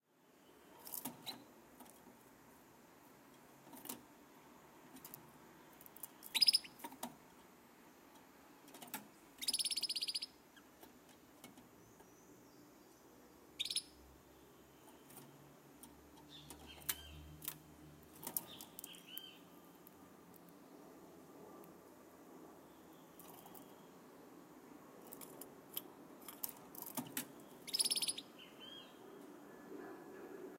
Canary Moving In Cage
Recorded a canary using a lapel mic on the cage
cage, canary, field-recording